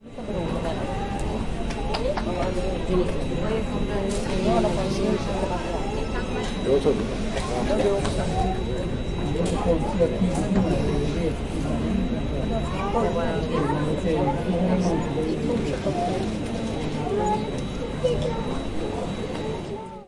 Recorded at a capital airport. One of the biggest airports ! Breeze, movement -- straight departure energy !!
If you enjoyed the sound, please STAR, COMMENT, SPREAD THE WORD!🗣 It really helps!
no strings attached, credit is NOT necessary 💙
Atmosphere, People, Ambience, Conversation, Travel, Chat, Crowd, Ambient, Terminal, Voices, Waiting, Chatter, Field-Recording, Trip, Airport